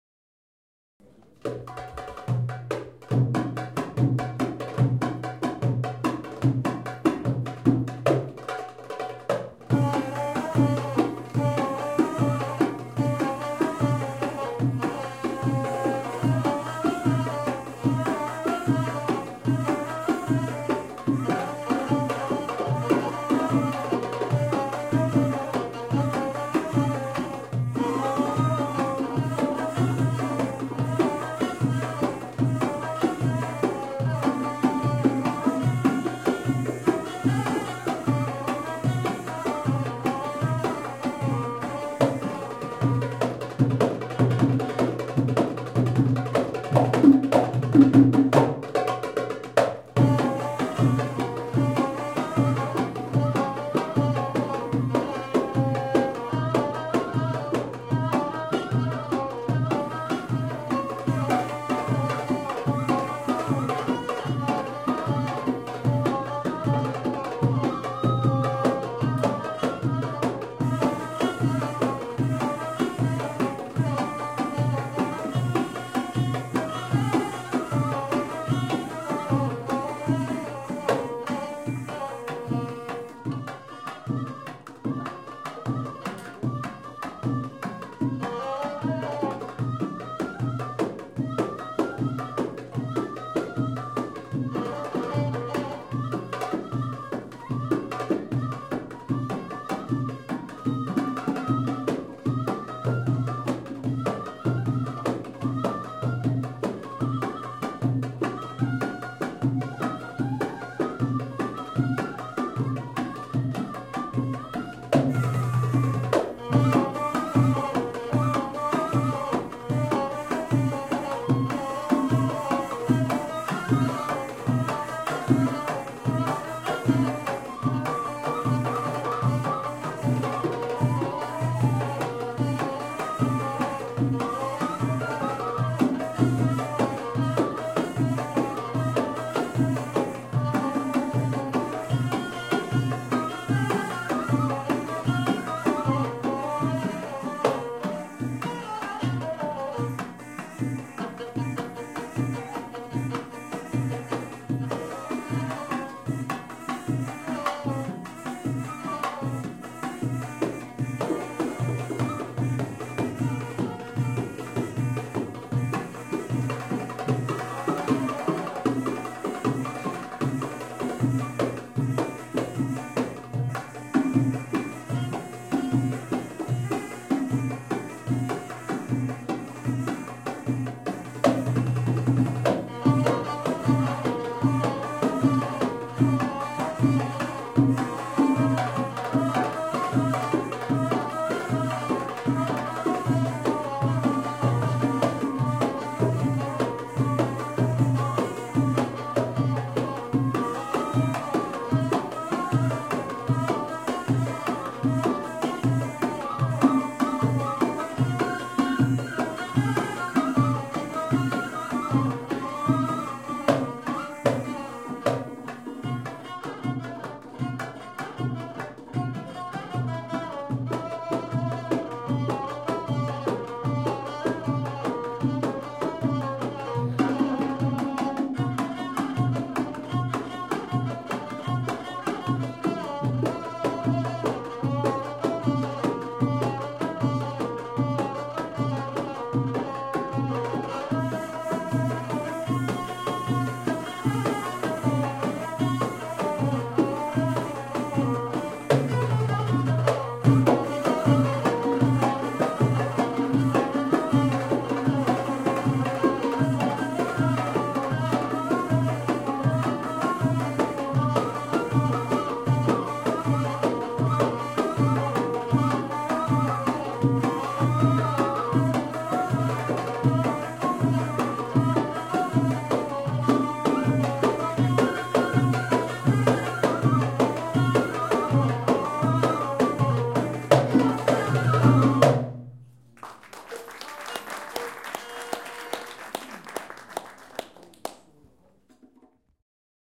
Gamalat Shiha Show
2014/11/18 - Cairo, Egypt
Egyptian Center for Culture and Art : Makan
Introduction to the show.
Applause.
ORTF Couple
Music, Egypt, Cairo, Cultural-Center, Egyptian-music, Show